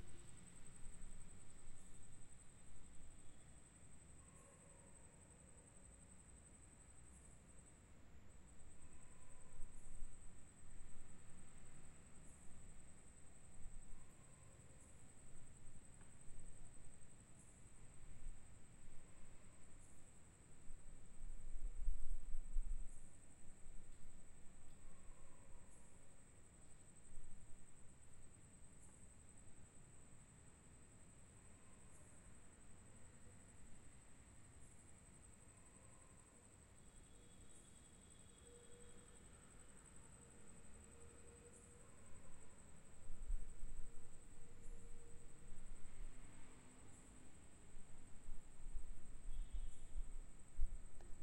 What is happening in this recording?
Night Ambience ...Recorded using a Zoom H4N
ambience, atmosphere, c, field-recording, nature, night, night-time